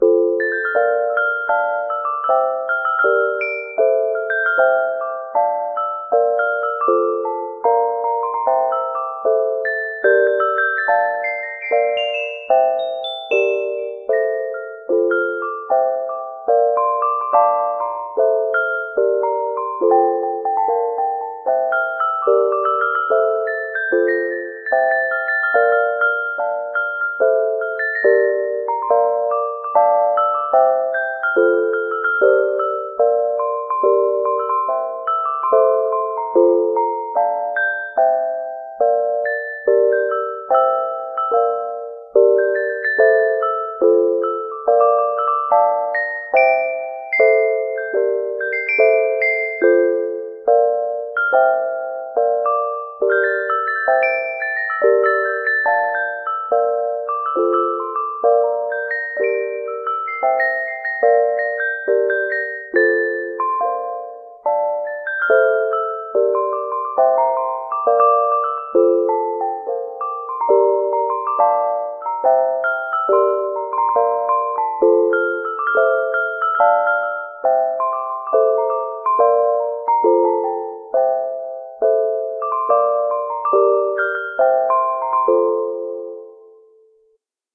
s chimes random
Chime sounds by my Casio synth. Random chords & melody.
Tempo isn’t accurate.
chords, loop, bells, chime, random, ding, melody, chimes